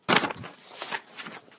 Grabbing a bundle of papers out of someone's hands
bundle, grab, grabbing, page, pages, paper, papers, snatch, snatching